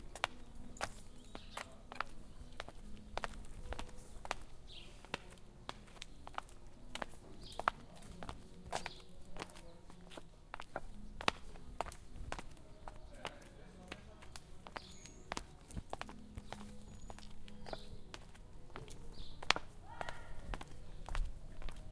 Passos na calçada / Walk in the sidewalk
Alguns passos na calçada com alguns pássaros de ambiente, gravado com um Zoom H4 no pátio do Centro de Artes da UFPel.
A few steps in the sidewalk with some birds, recorded with a Zoom H4 in the courtyard of Centro de Artes of UFPel.
footstep, walking, step, pelotas